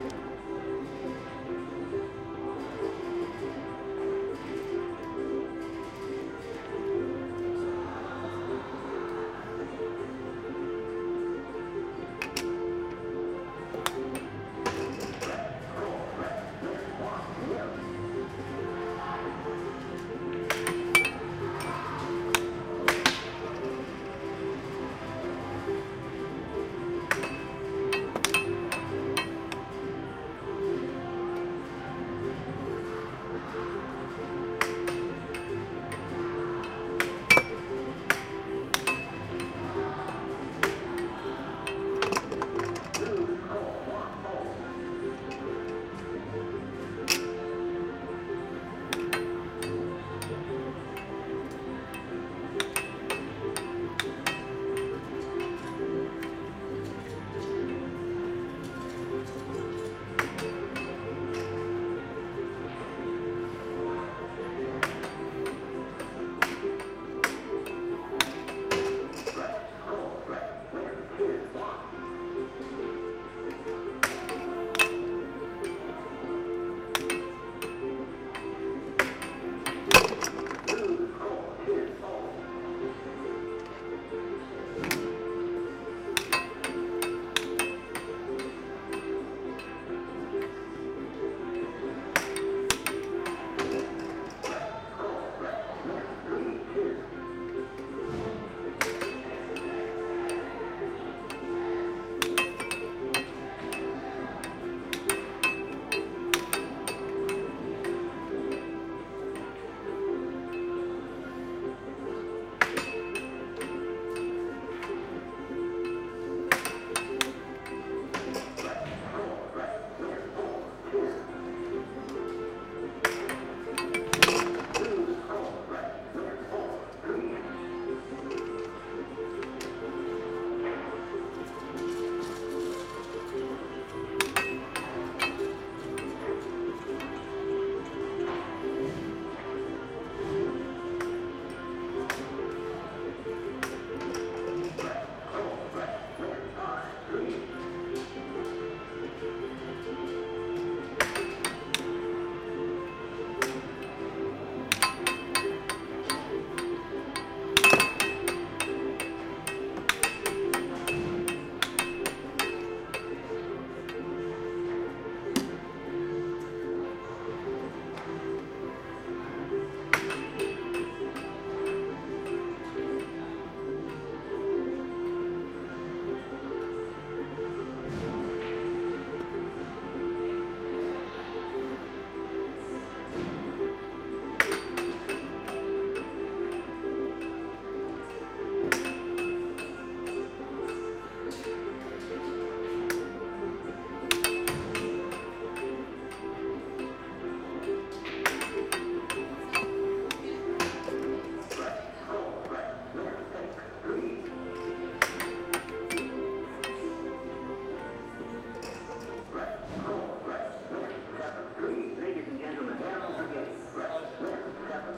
Air hockey arcade ambience distant music
Stereo recording using Zoom H2 recorder.
A recording of a game of Air Hockey. Nice stereo effect of puck being hit from side to side. Background ambience of amusement arcade in bowling alley. Recorded at a quiet time. Unfortunately the air hockey machine has some background music playing.